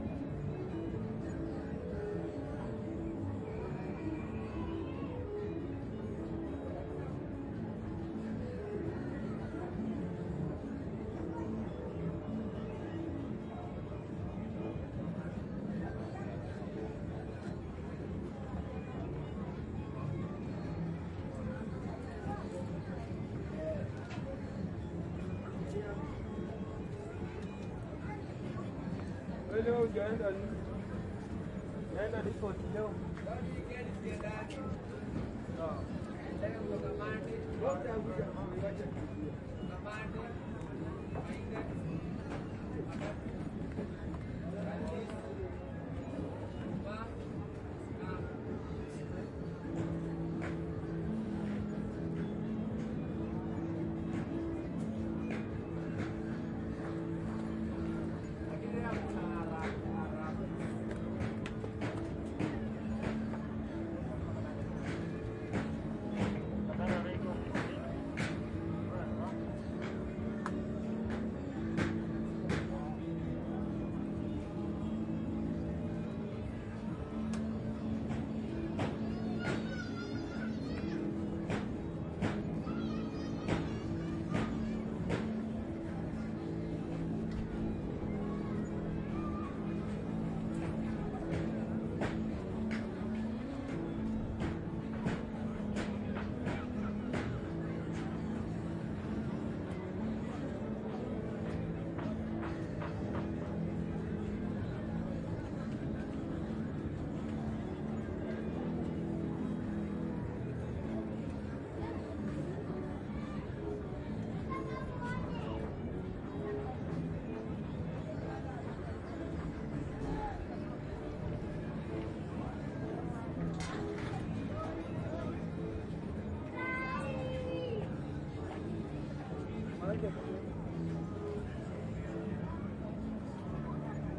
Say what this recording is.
Kenya, in the slums of Nairobi , neighborhoods radios 2
In the slums of Nairobi voices radio
ORTF Schoeps
Kenya, nairobi, neighborhood, radio, slums, voice